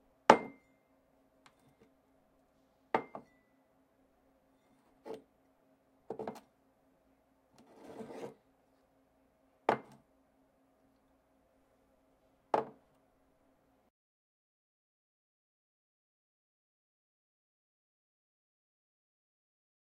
Ceramic Mug Cup
Placing a ceramic mug on a table
ceramic, cup, mug, placing, table